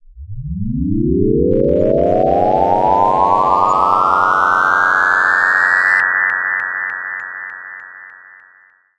UFO Cất Cánh
UFO take off like from Hanna Barbara's space cartoons (stereo):
AUDACITY
For left channel:
- Generate→Chirp...
Waveform: Sine
Frequency Start: 1
Frequency End: 1800
Amplitude Start: (0.3)
Amplitude End: (0.3)
Interpolation: Linear
Duration: 00h 00m 06.000s
- Tracks→AddNew→MonoTrack
- Generate→Silence...
Duration: 00h 00m 16.000s
- Tracks→Mix and Render
- Effects->Echo
Delay time: 0.3
Decay factor: 0.8
- Cut become 9.0s
-Effect→Fade In
From 0.0s to 1.2s
- Effect→Fade Out
From 6.0s to 9.0s
For right channel (same formula for right channel except Chirp):
- Generate→Chirp
Waveform: Sine
Frequency Start: 2
Frequency End: 2000
Amplitude Start: (0.3)
Amplitude End: (0.3)
Interpolation: Linear
Duration: 00h 00m 06.000s
space, UFO, sci-fi, alien, spaceship